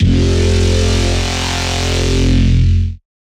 ThickLocustWTBassF0160bpm
Thick Locust WT Bass F0 - Serum wavetable bass with wavetable sync and quantize modulation, some noise mixed in and multi band compression ... maybe a little saturation at the end. This one just puts its foot down and screams at you.
**There is also a Bass/Lead counterpart for this sound found in this pack. In most cases the only difference is that the fundamental frequency is one octave up or down.**
140bpm, 160bpm, 170bpm, Bass, Synth, Wavetable